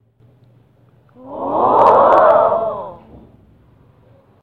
Ohh (Walla)
Just a random walla sound I did since I can't find a right reaction sound of audiences in the internet.
Like in my most walla sounds, I recorded my voice doing different kinds of "ohhs" and edited them out in Audacity.
:D
audience, crowd, ohh, sitcom, surprised, walla